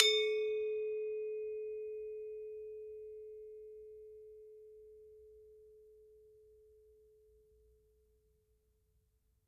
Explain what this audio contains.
Bwana Kumala Ugal 10
University of North Texas Gamelan Bwana Kumala Ugal recording 10. Recorded in 2006.
bali, gamelan, percussion